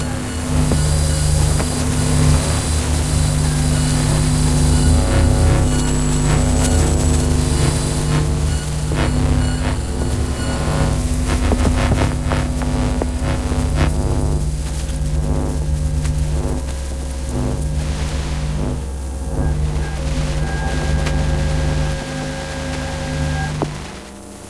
An electric static ambience loop sound to be used in sci-fi games, or similar high tech sounding games. Useful for envionments with computers, or televisions, for making an eerie feeling of abandonment while the main character is unraveling big secrets.